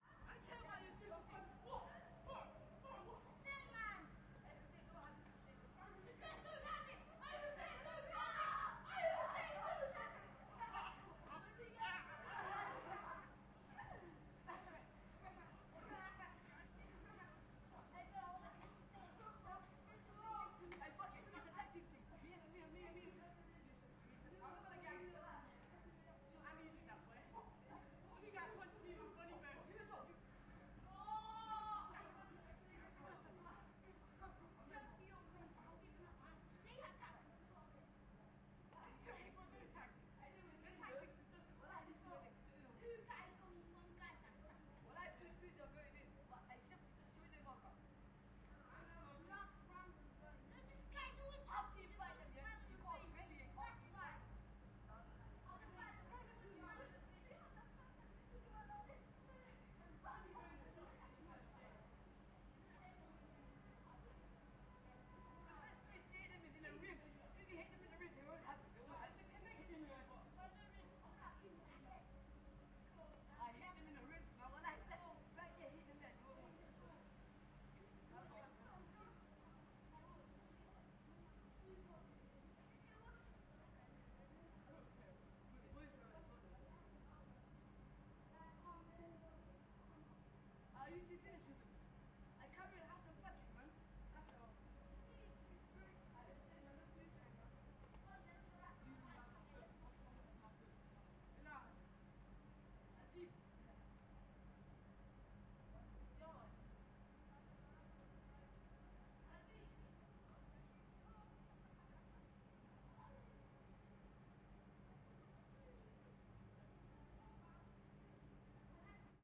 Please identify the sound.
London - Kids shouting ext distant
A recording of a group of kids playing and screaming ext. Recorded with a Zoom H4n.
distant-kids, Kids, kids-playing, kidsscreaming, Kids-shouting, London, screaming